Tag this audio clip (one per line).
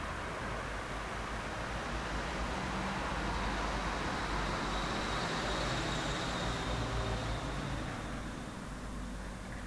noise
road